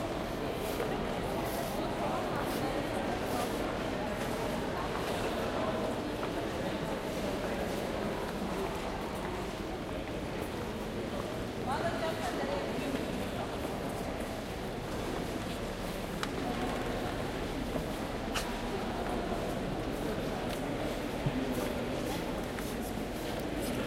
People in a large hall with a lot of reverberation.
space, hall, people, large